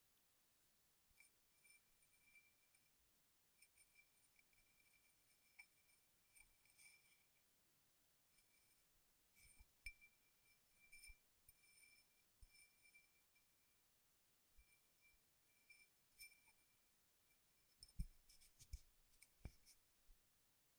The sound of quiet ringing of glass holding in hand. Sound recorded with condenser microhpone sE X1 and denoised with Izotope RX denoiser.